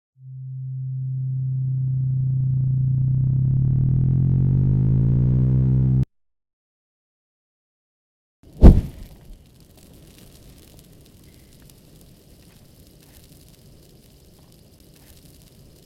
I set my horse on fire to thank you all for 10 subs
synth, burn